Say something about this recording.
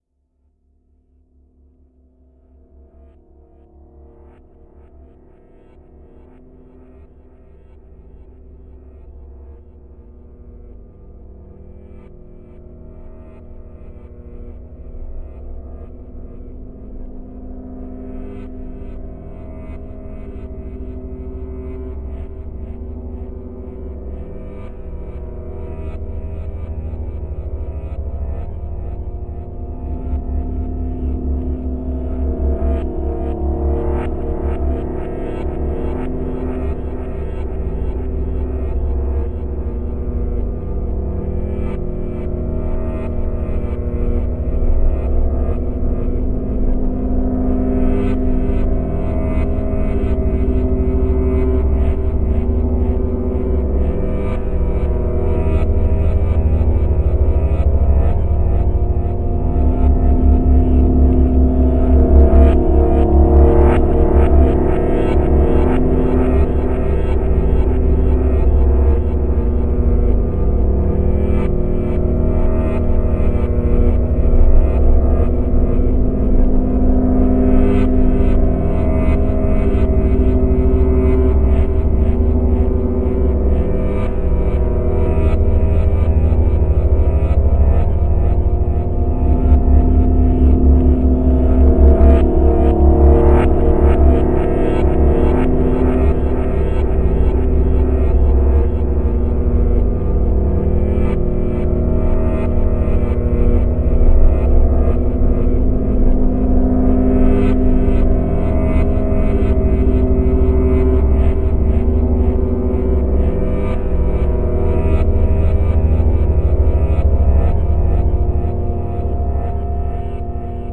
A harmonica, layered/looped/effected at various speeds.